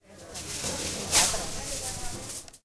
This sound was recorded in the UPF's bar. It was recorded using a Zoom H2 portable recorder, placing the recorder next to somebody who was cutting a piece of aluminium foil.
campus-upf, bar, aluminium-foil, sandwich, UPF-CS13